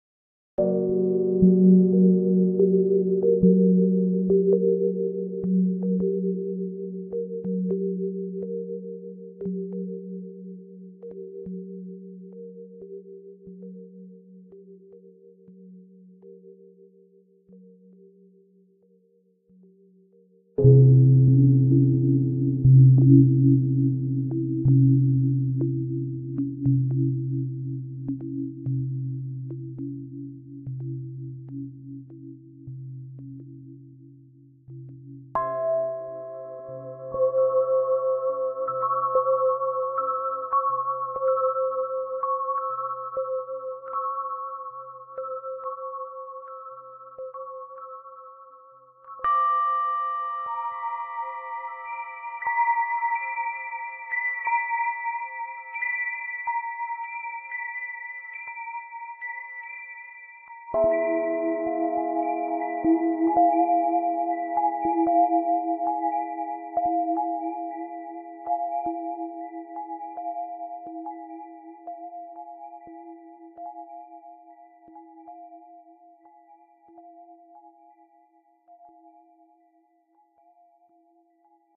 Bells Mystery Eerie
Mysterious bells sound eerie and all.
mystery, eerie, bell, horror, gothic, chime, haunted, bells, ring